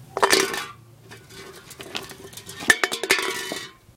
Rolling Can 12
Sounds made by rolling cans of various sizes and types along a concrete surface.